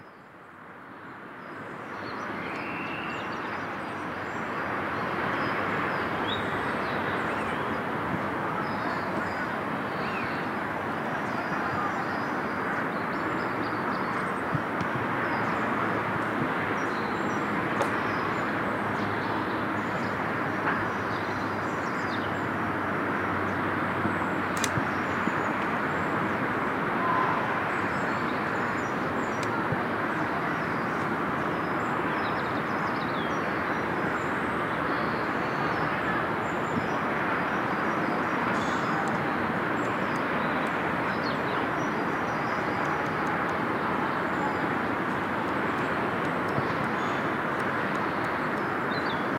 Ambiente - Carretera cerca de bosque
Environment - Forest near a roadway
MONO reccorded with Sennheiser 416